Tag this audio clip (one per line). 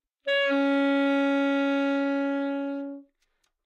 alto
Csharp4
good-sounds
multisample
neumann-U87
sax
single-note